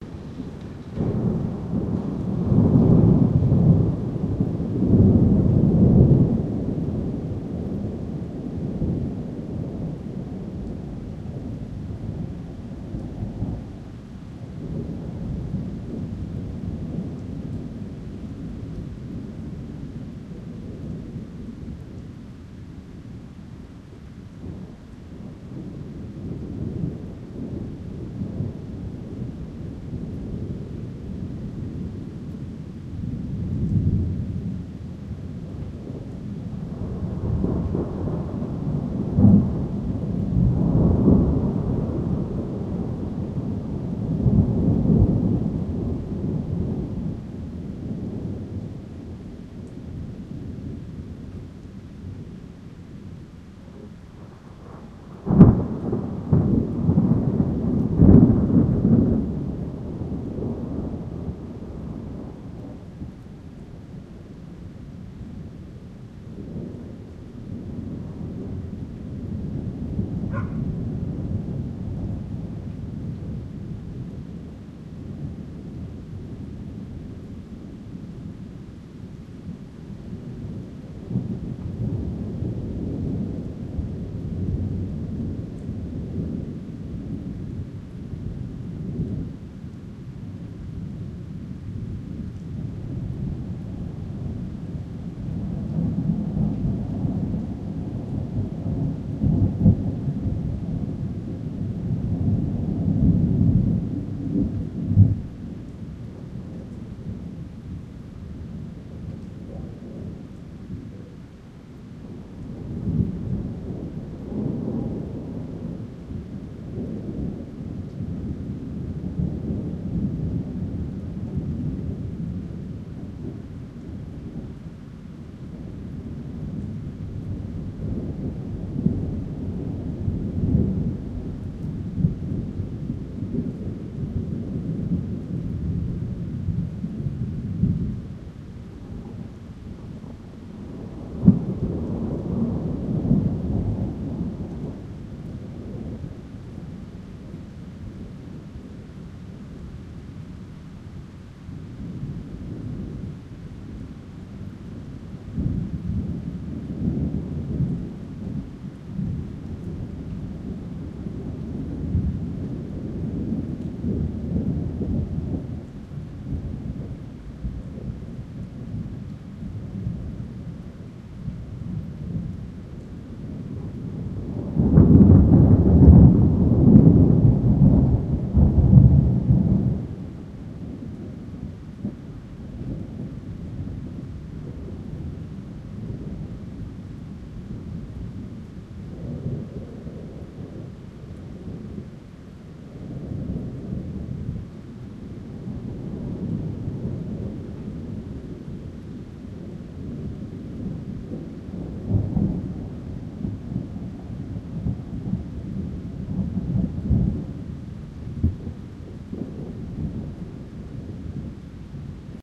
Nagranie wykonane podczas burzy nad Warszawą 19 lipca 2015 roku z użyciem włochacza zasłaniającego mikrofony.